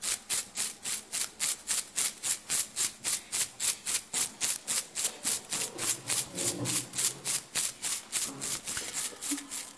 mySound JPPT5 Mariana
Sounds from objects that are beloved to the participant pupils at Colégio João Paulo II school, Braga, Portugal.